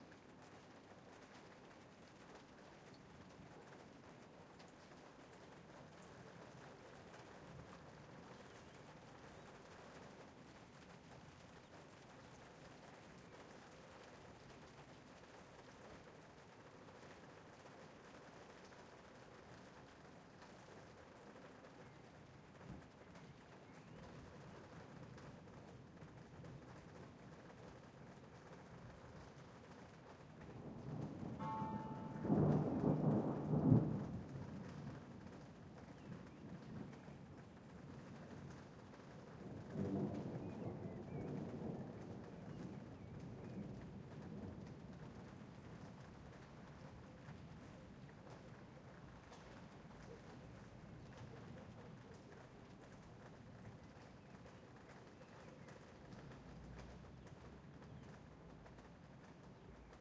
a minute of a storm in santiago de compostela, Galiza, Northwest Spain.
2 x 416 AB to a roland R88